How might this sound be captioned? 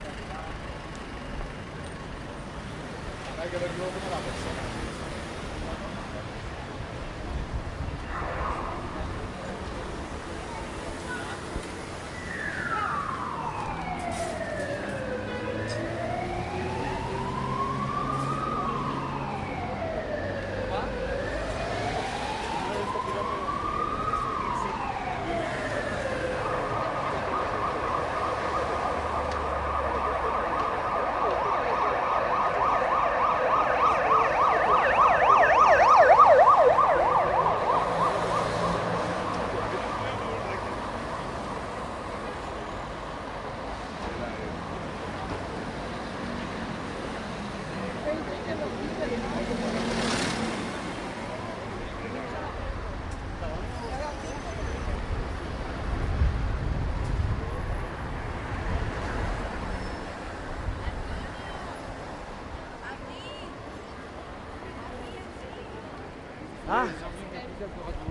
STREET AMBULANCE 01
walking along the Gran Vía of Madrid with the sound of an ambulance, people talking, etc. Zoom H1 recorder.
madrid, ambulance, cars, spanish, street, conversation, ambient, spain, city